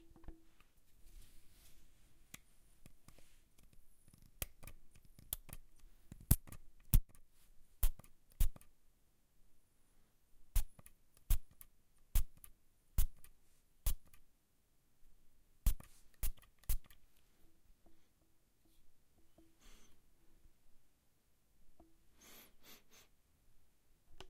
close perfume spray recorded with zoom h4n onboard mic